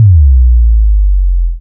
bassdrop01short
This is a simple but nice Bass-Drop. I hope, you like it and find it useful.